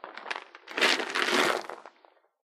Shake, Pepples, Shovel Shuffle
Foley for a bug scurrying along rocks in a glass tank, shuffling around in pebbles. Made by shaking a plastic jar of almonds.
falling, dice, toss, rocks